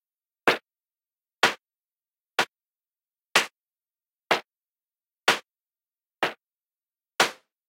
Electronic clap loop at 125 beats per minute. Each clap in the patterns is slightly different.
loop, clap, electronic